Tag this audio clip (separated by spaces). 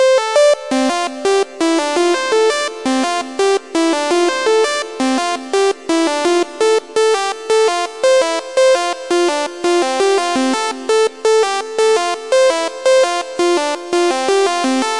house lead edm trance club 168bpm electro progressive synth electronic rave loop techno